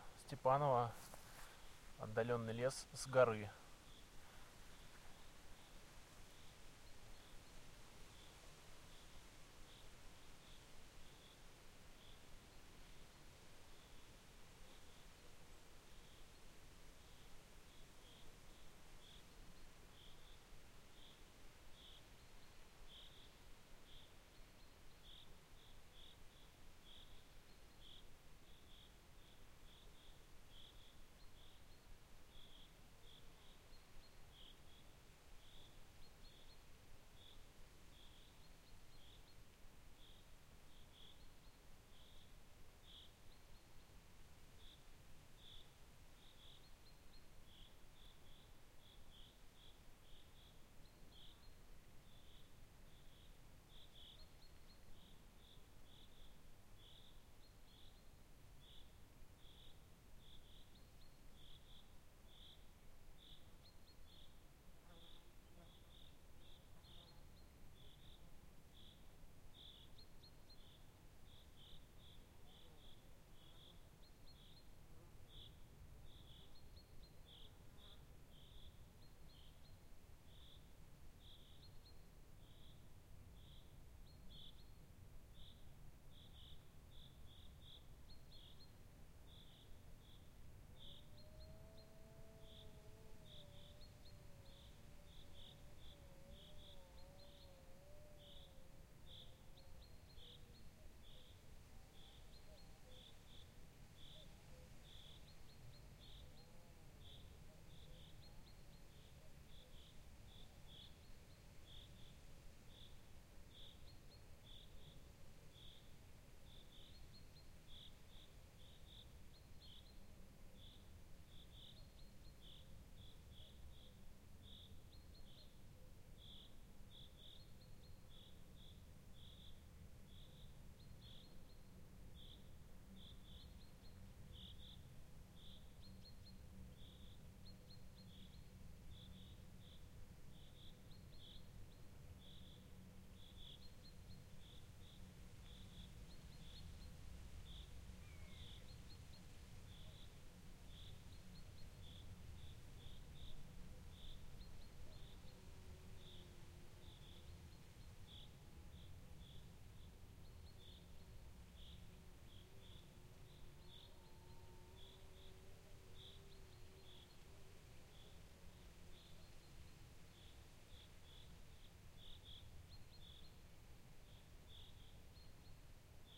ambience, forest, stepanovo, province
Recorded using Zoom H5 XYH-5 mics. Forest near Stepanovo (near Moscow)
wind, woods, birds, forest